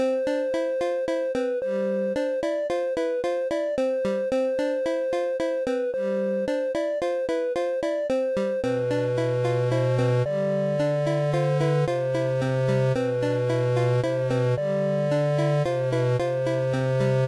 Pixel Song #28
happy, loopable, music, pixel, simple